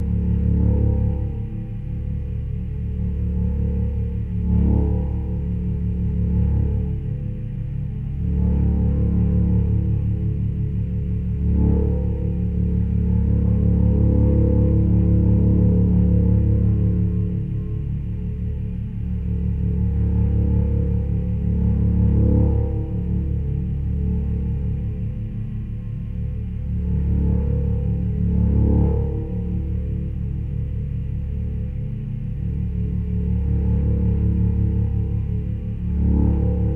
Made with an analog Doepfer modular synth, loudspeakers on top of two resonating barrels with contact microphones. Sound was originally 4-Channel.